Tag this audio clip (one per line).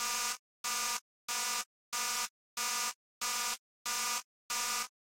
alarm
alert
beep
beeping
caution
cinematic
computer
detector
effect
effects
film
fx
game
interface
machine
movie
science-fiction
sci-fi
score
sfx
signal
sound-design
sounddesign
UI
user-interface
warning